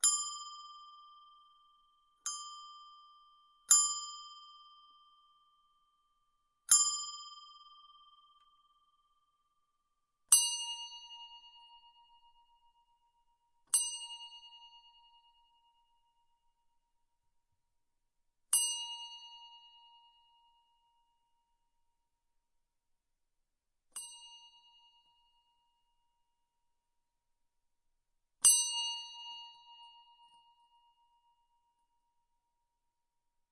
Cloches de vaches.
bell, bells, bronze, cloche, cloches, ding, ring